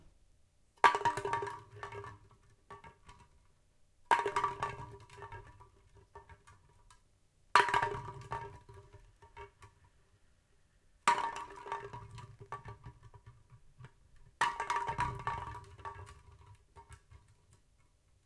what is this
Rolling Can Sound 2
Recorded rolling can sound. I Used AT2020 microphone